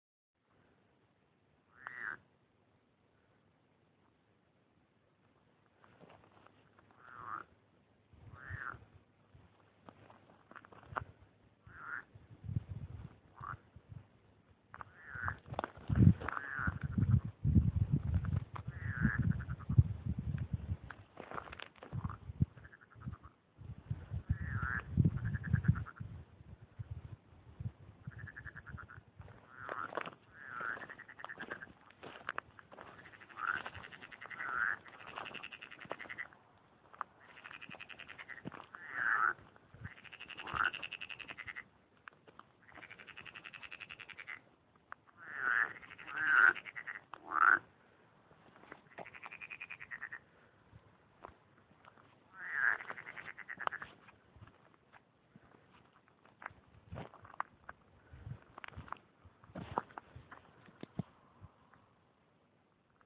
frogs croaking, getting louder as the person approaches (footsteps on dry grass)
Recorded 8th June 2015 at 11 pm on a field in the outskirts of Hamburg, south-east of the centre near the Elbe (a field North of the street Kirchwerder Elbdeich). Recorded on a Samsung Galaxy S3 phone.
croak, croaking, field-recording, frog, frogs, nature, night